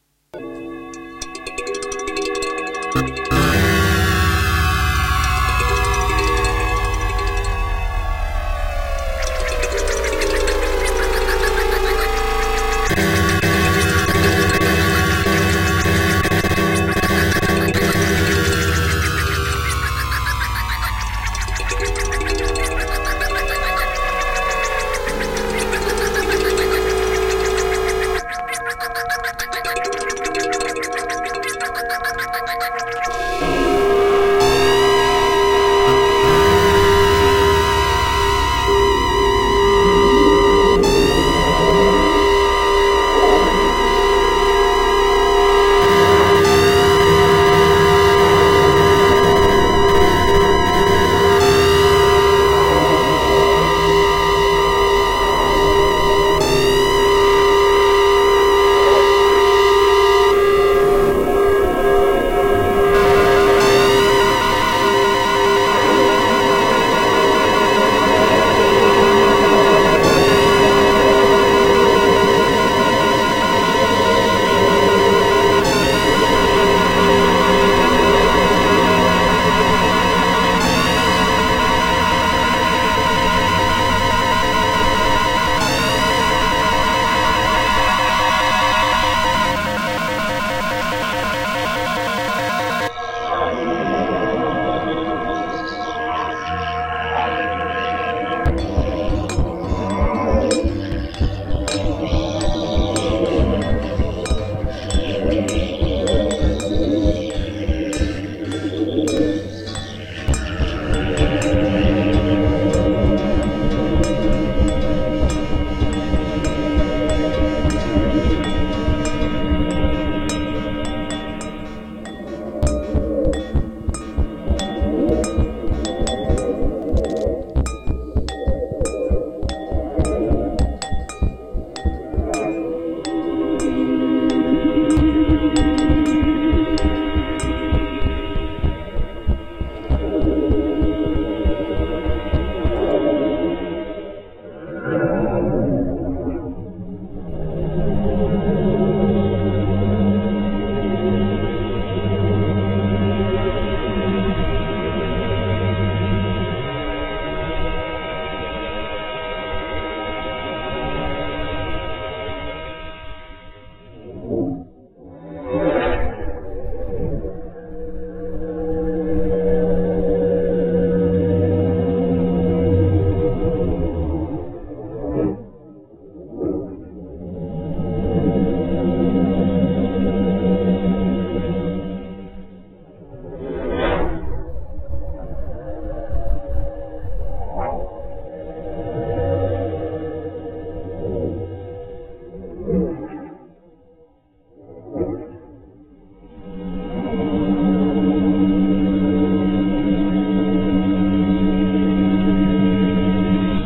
This is a conglomerate of sound clips, more than one hundred, which are put together one by one, carefully, to build a sound complex with many surprises. The sounds have been created so many ways that I can't tell you what is what any longer. It's like picking up junk and garbage from a waste bin and making something beautiful of it.